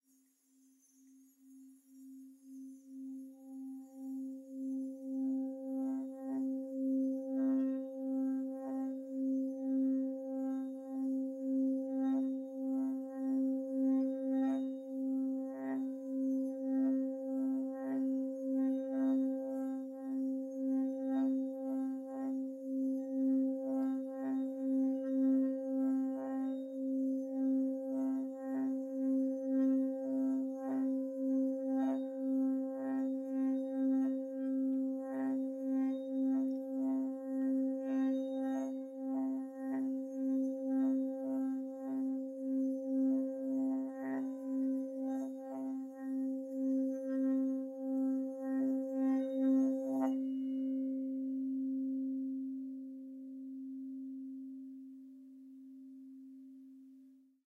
Singing Bowl singing
Tibetan singing bowl played with soft mallet.
Low cut for ya n all.
Rode NTK mic as per usual.
Namaste!
bowl, meditation, sing, yoga